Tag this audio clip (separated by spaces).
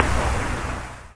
historical impulse response vintage